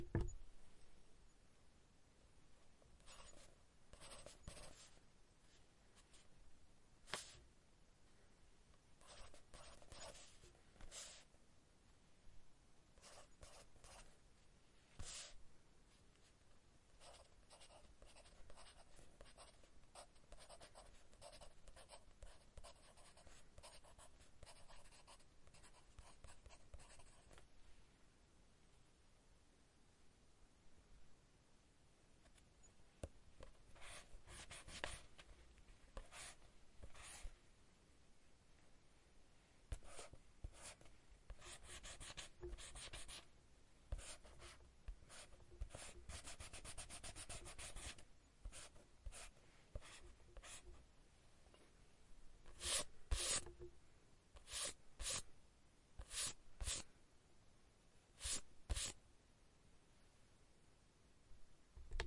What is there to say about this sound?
Lapiz y goma
pencil, eraser, writing